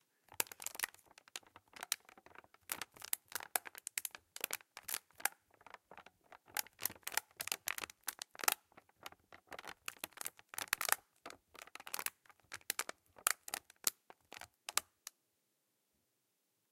mySound Piramide Rahma
Sound from objects that are beloved to the participant pupils at the Piramide school, Ghent. The source of the sounds has to be guessed.
BE-Piramide, mySound-Rahma, plastic-bottle